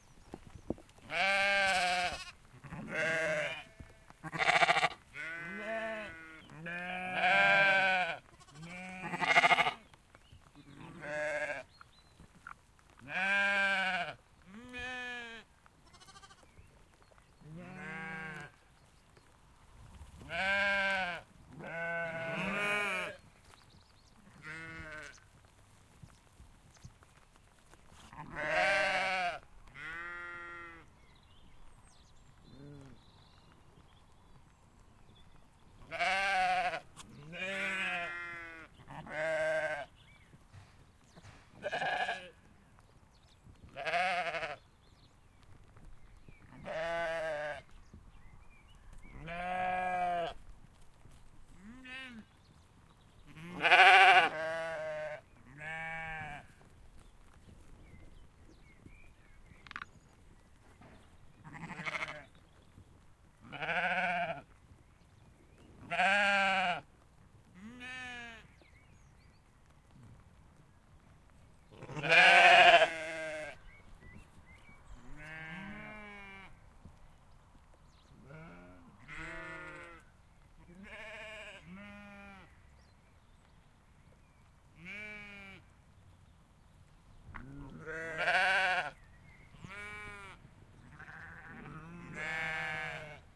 Sheep Lambs Rhos Wales 7pm April 1
Every evening on our stay at this sheep farm, the sheep and their lambs would gather by the fence near to us. It was raining heavily and there was some wind. Recorded on a H4N Pro.
field-recording, Ambiance, Countryside, ambient, spring, lambs, field